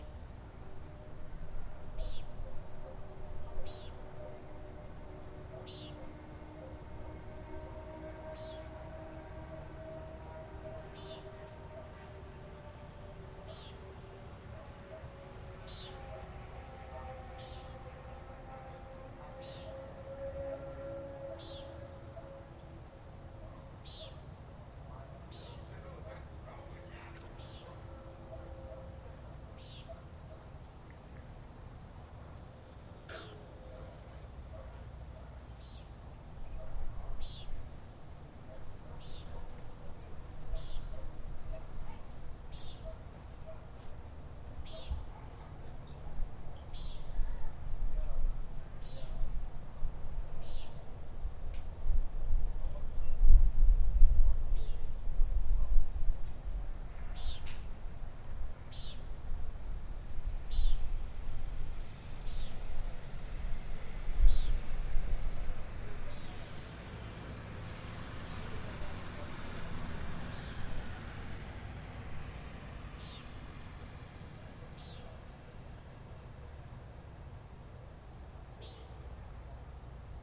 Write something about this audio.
Out on the patio recording with a laptop and USB microphone. As it gets dark the birds change into insects and walkers come out where the blazing sun once cooked.